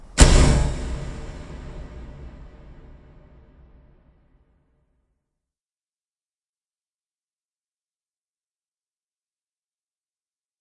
The door of an MG-B being closed, processed to add a long, spooky echo. Recorded with a Marantz PMD-661 with built-in microphones, and processed with Audacity.
Prepared for (but not used in) A Delicate Balance, Oxford Theatre Guild 2011.